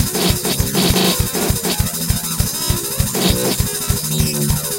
Starwars Chase 400
100, 200, 400, beat, bpm, catchy, drum, loop, music